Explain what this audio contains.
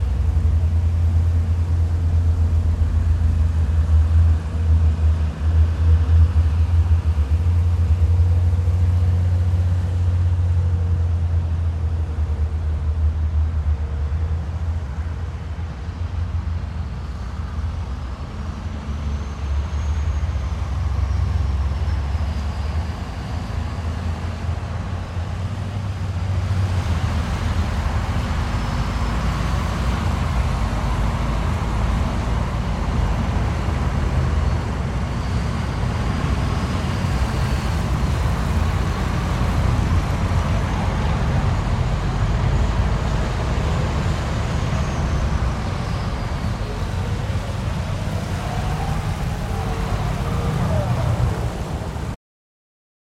WAR-LEOPARD, TANK- PASSING BY CLOSE-Leopard tank passing by, changes gears-0003
Heavy trucks, tanks and other warfare recorded in Tampere, Finland in 2011.
Thanks to Into Hiltunen for recording devices.
Leopard2A4, parade, tank, warfare